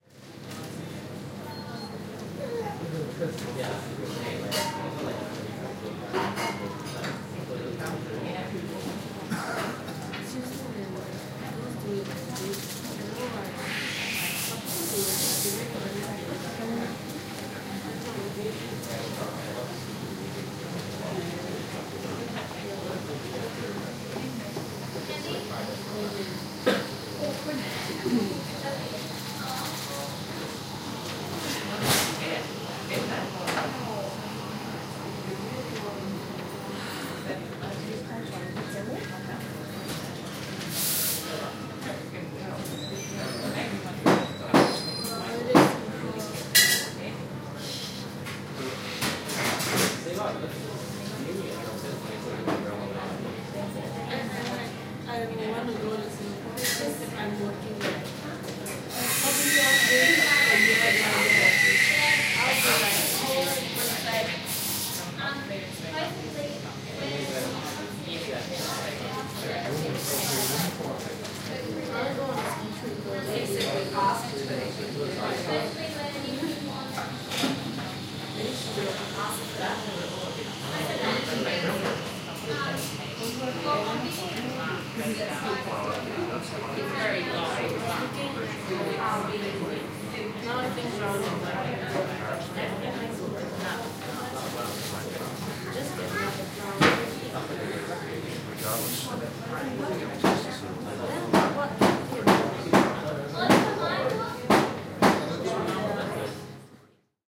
Coffee Shop Ambiance
Sitting in a coffee shop (inside a book store).
ambiance, binaural, coffee-shop, espresso, field-recording